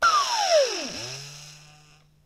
recorded from a Dyson vacuum cleaner
mechanic, dirty, industrial, motor, vacuum, hoover, clean, cleaner